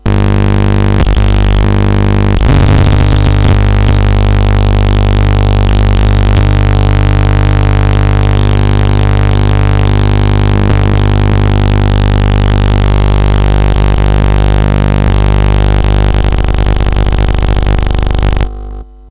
KEL-NastyDrone5
You know these Electronic Labs for kids & youngsters where one builts electronic circuits in a painting by numbers way by connecting patch-wires to springs on tastelessly colourful boards of components?
I tried and recorded some of the Audio-related Experiments - simple oscillators, siren, etc. from a Maxitronic 30 in One Kit.
I did not denoise them or cut/gate out the background hum which is quite noticable in parts (breaks) because I felt that it was part of the character of the sound. Apply your own noise reduction/noise gate if necessary.
DIY, Soundeffects, Oscillator